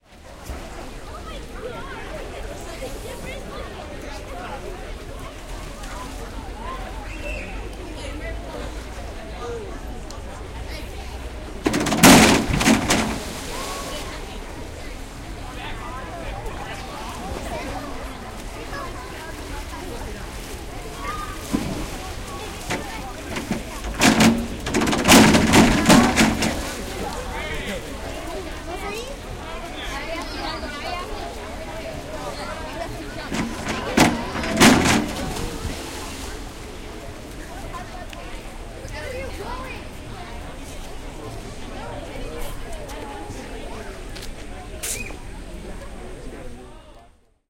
Diving Board Close
RECORDING MADE AT THE DIVING BOARD, SPROING!! Recordings made at Barton Springs,a large naturally occurring swimming hole in Austin Texas. Stereo recording made with 2 omni lav mics (radio Shack) into a minidisc. transfered via tascam dm24 to computer for editing.
ambience, atmosphere, city, field-recording, human, pool, swimming, water